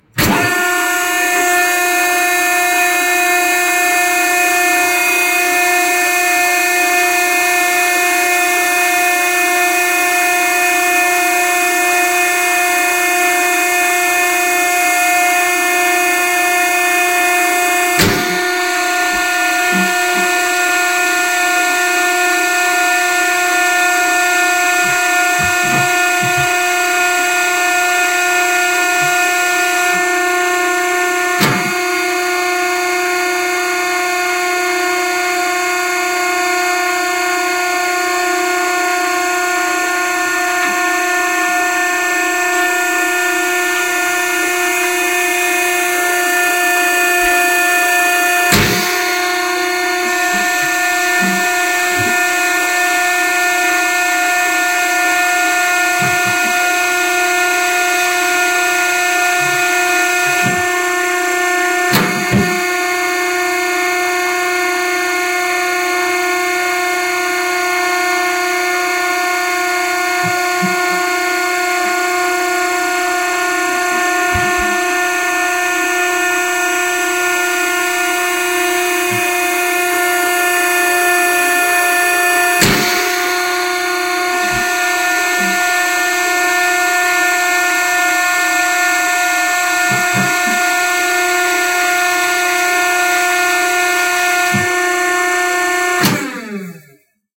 Dumpster Press 2
(CAUTION: Adjust volume before playing this sound!)
A new (Cleaner) recording of a dumpster compressing machine at a local grocery store. When the machine is activated, makes a loud motor noise that uses hydraulics to compress the garbage within the dumpster, right before it shuts down. Utilized different software to not only clean the audio of background noise but enhance it to the best of my ability